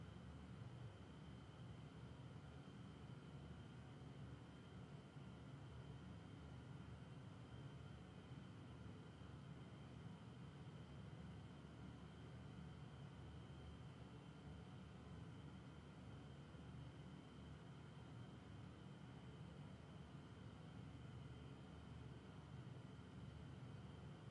room tone night ambience rumble cricket
Very gentle Room tone generated using convulsion reverb
can be used as background and loop able